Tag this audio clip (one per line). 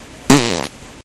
aliens
beat
car
computer
explosion
fart
flatulation
flatulence
frog
frogs
gas
laser
nascar
noise
poot
race
ship
snore
space
weird